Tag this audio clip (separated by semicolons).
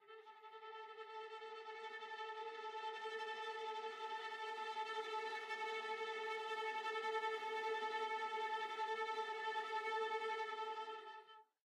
a4; viola; multisample; midi-note-69; single-note; strings; vsco-2; viola-section; midi-velocity-31; tremolo